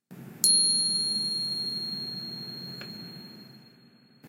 Japanese style wind chime called "FUURIN".
that sounds notice us wind come and cool.
Japanese feel cool by that sound.
that means Summer in japan.